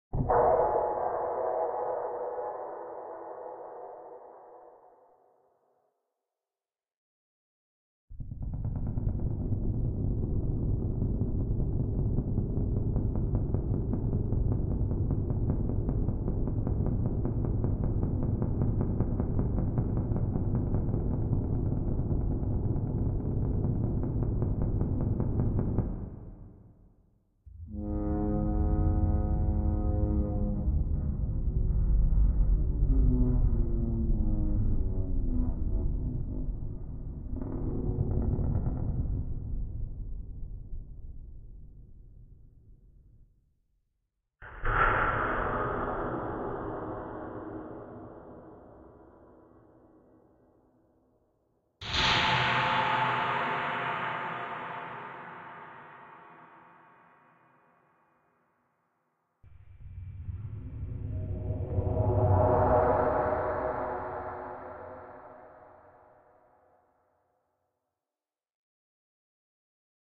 Horror pack 1
Some sounds to horror movie
crepy, evil, monster